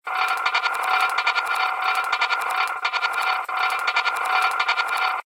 Water dripping in a large metal sink. Sound is repeated and overlapped to mimic the sound of a roulette board. Sound is very chopped. Recorded on mac Apple built in computer microphone. Sound was further manipulated in Reaper sound editor.